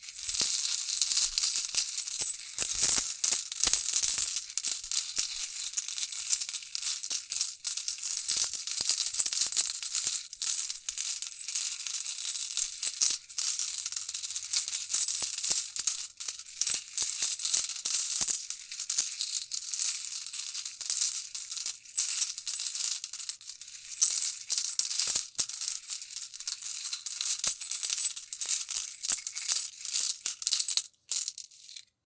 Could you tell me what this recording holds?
coindrop-sample
4 layers of coins being dropped into palms, blended and panned forming a continuous clinking sound. Always fun to see what people get up to though, so post a link here with your work if you want to :)
coins, metal, gold, cash, jackpot, coin, clinking